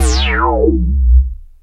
A thick, rich, chorused falling filter sweep with pitch modulation from an original analog Korg Polysix synth.
analog, bleep, chorus, drop, fat, filter, fx, korg, low, polysix, sweep, synth, thick, vibrato, warm